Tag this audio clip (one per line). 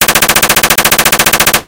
Action
Assault-Rifle
Battle
Battle-Field
Call-Of-Duty
Combat
Firearm
Fire-Fight
Game
Gun
Gunshots
Light-Machine-Gun
Machine-Gun
Modern-Warfare
pgi
Realistic
Rifle
Shooting
Shots
Sub-Machine-Gun
Video-Game
War
Weapon